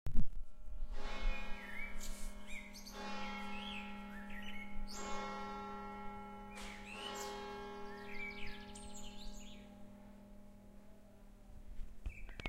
Church bell sound recorded in the early afternoon. Birds on the background. Recorded with Yamaha Pocketrak cx
dong, birds